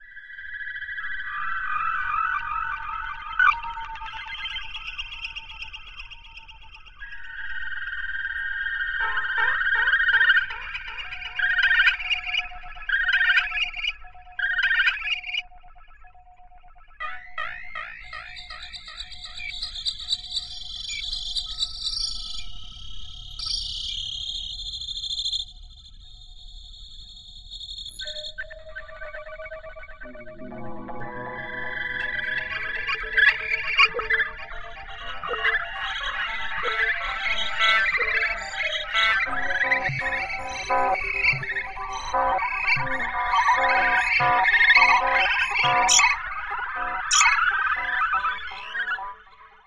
phone ring 1
Old field recordings originaly made for a friends short film that focussed on the internet and telecommunications. Think I used Reaktor and Audiomulch. I always do my topping and tailing in Soundforge.
field, recording, processed